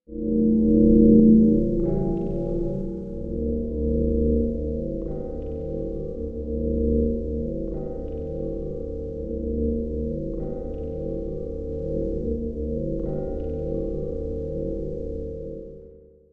pad 007 deepspace hammered acoustics
deep pad sounds based on mallet sounds, physical modelling
dub, echo, experimental, key, mallet, pad, reaktor, sounddesign